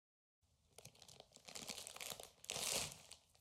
Opening a Bag of Chips
The satisfying sound of a bag of chips that has been opened for the first time. This sound was recorded of a Tascam and edited on Reaper.
open, OWI